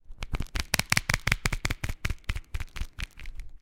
Twisting a child safety cap on a pill bottle for a fat, stuttered click. Nice bottom end that could be accentuated.
bottle, child, MTC500-M002-s13, pill, plastic, ratchet, safety, top